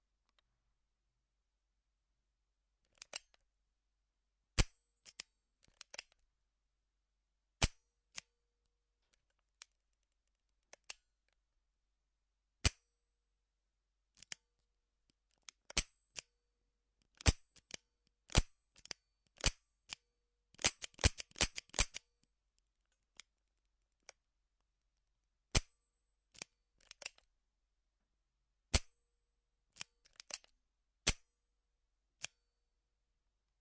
Revolver Dryfire
Dryfire, cocking the hammer and pulling the trigger. (double and single action) Ruger GP100 .357 Magnum revolver. Recorded indoors
Always fun to hear where my recordings end up :)